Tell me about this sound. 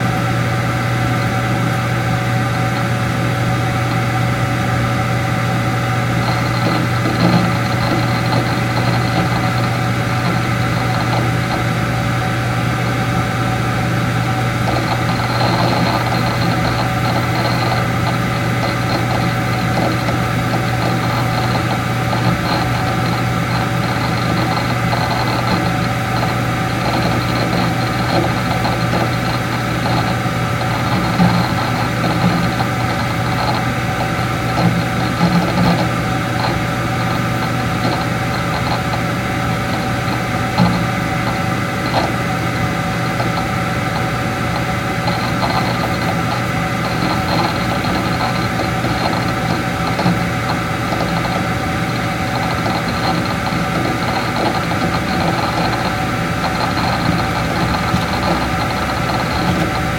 Recording computer harddisk with noises from computer fan. Microphone: Behringer ECM8000 -> Preamp: RME OctaMic -> RME QS